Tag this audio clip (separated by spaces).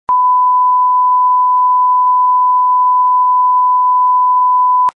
No TV channel signal